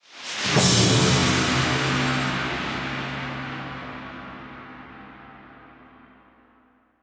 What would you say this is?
Cinematic Percussion 001
A cinematic percussion library for every serious composer
audacity, Cinematic, Drum, flstudio, FX, Percussion